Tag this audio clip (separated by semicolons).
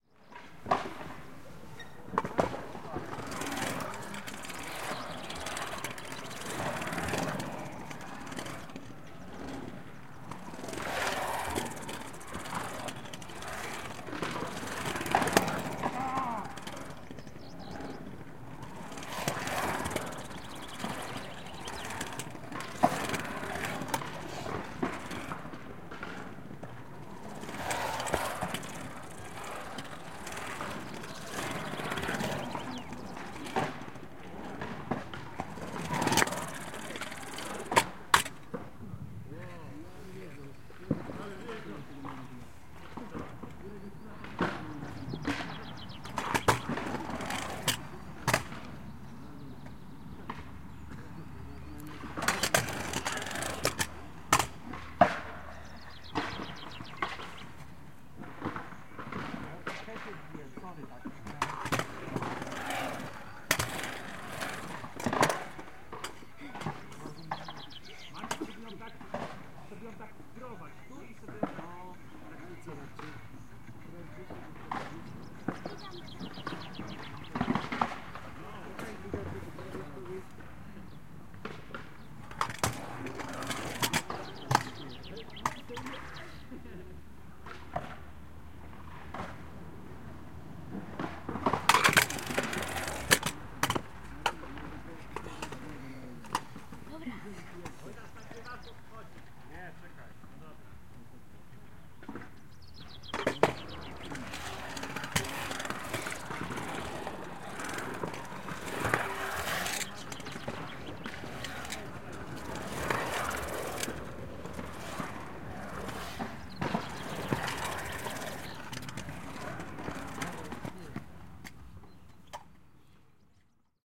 skateboards; birds; field-recording; scooter; ambience; evening; park; ambiance; city